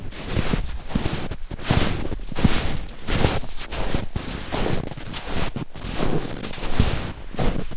fotsteg i djupsnö 2
Footsteps in deep snow. Recorded with Zoom H4.
deepsnow, footsteps